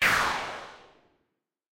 Created with Logic drum machine for a video game.